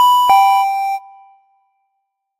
Doorbell Alert
8-bit style doorbell.
alert, 8-bit, asset